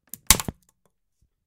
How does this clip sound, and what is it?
Wood Snap 5
broken-bone, sharp